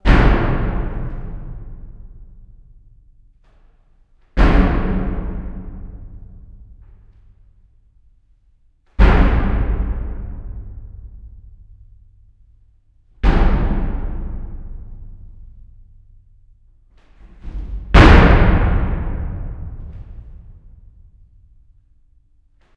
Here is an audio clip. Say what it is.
Jumping on Wooden Plate in Hall
In a pretty big empty basement at our school (HKU - KMT, Hilversum, Netherlands) there's a big wooden plate covering something. I made a friend jump on it. Big boom! I'm sorry that the loudest jump caused a distorted recording (clipping).
Recorded in Stereo (XY) with Rode NT4 in Zoom H4.
bang, bass, smash, boom, big, jump, wooden, thumping, crash, bouncing, wood, thump, hall, booming, explosive, explosion, grand, huge, bounce, church, plate, jumping